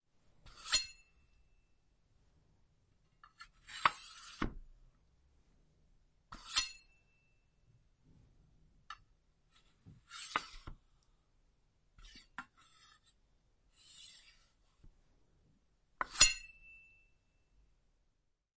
pulling out a sword or knife and putting it back
Pulling out a sword or a knife from a sheath and putting it back in.
Recorded with Zoom H4n.
back, blade, combat, draw, fight, holster, knife, knight, medieval, metal, pull, scabbard, sheath, sword, unsheath, weapon